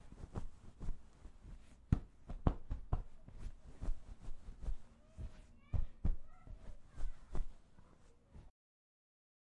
I'm a student studying sound and I and recording sounds this is one of the recordings.
This sound is done by hitting a big pillow by hand.